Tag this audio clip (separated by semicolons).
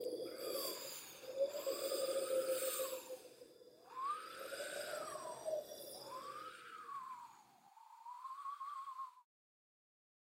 nature; wind; woosh